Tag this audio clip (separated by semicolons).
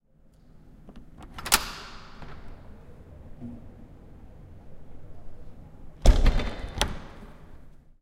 classroom; open; campus-upf; UPF-CS12; window; close